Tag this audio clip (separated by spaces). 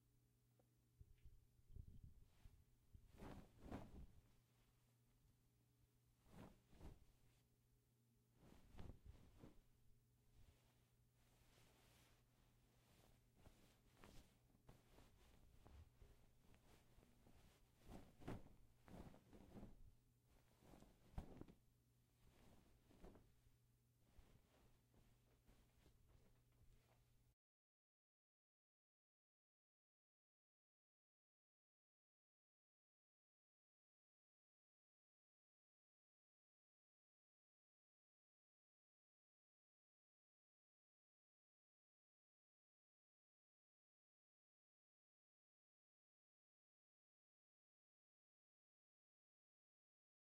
bed sheets